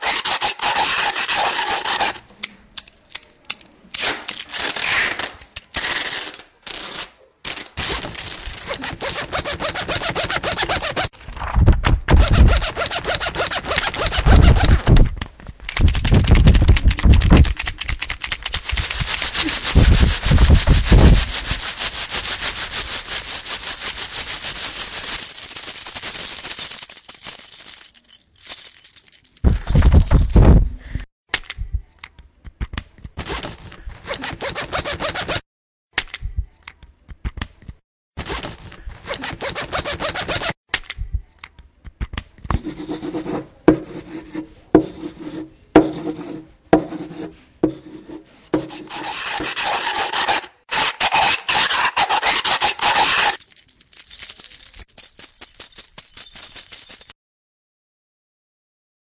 Belgian students from Stadspoortschool, Ghent used MySounds from French students to create this composition.